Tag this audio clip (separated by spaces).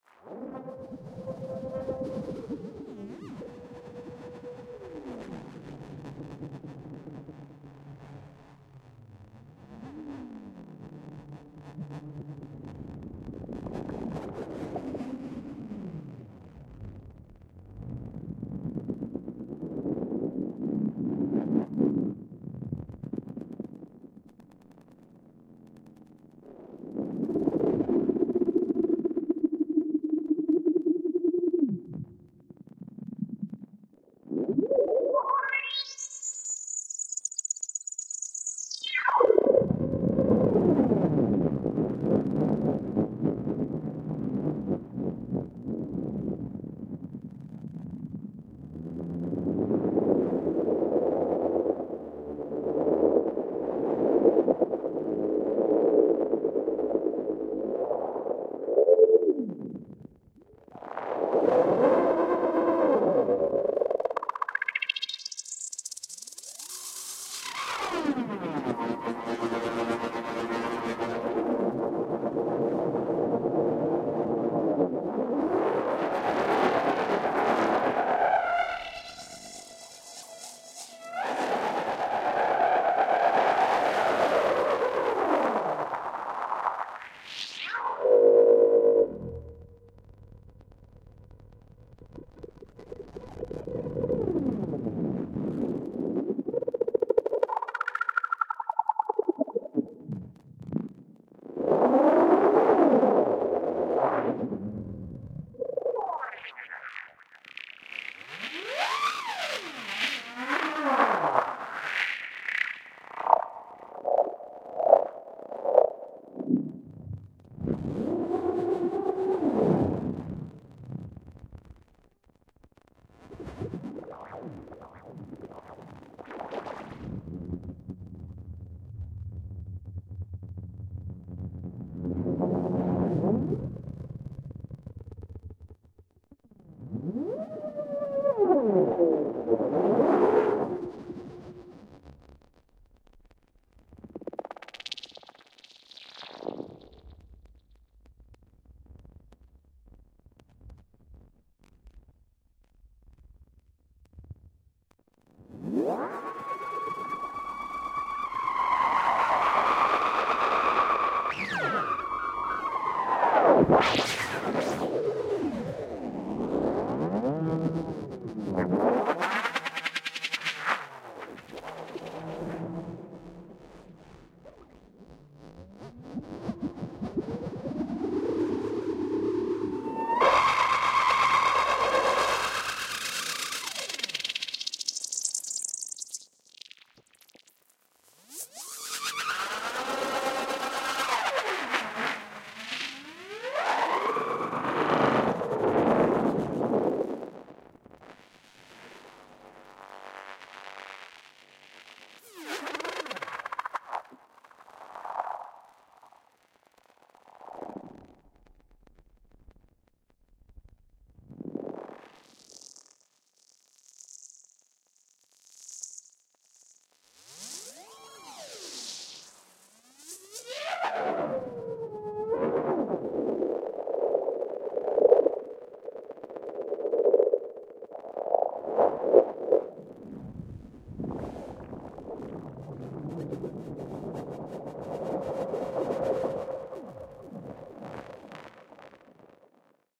drone effect electronic granular reaktor soundscape space